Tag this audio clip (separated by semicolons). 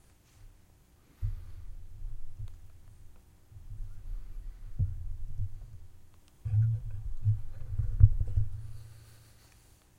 effect; industrial; piano; sound; sound-effect